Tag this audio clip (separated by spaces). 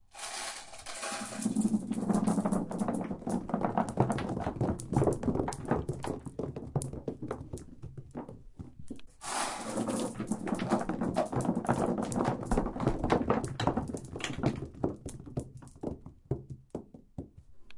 Stairs Falling Pebbles